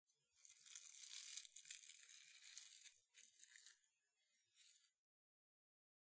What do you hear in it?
Foley Leaves dry crackle A-002
Dry leaves being crumbled up.